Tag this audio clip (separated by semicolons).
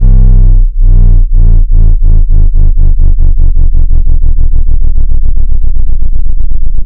140-bpm; audacity; bass; down; loop; low; pitch-shift; power; power-down; sine; sub; sub-bass; tech; technology; wobble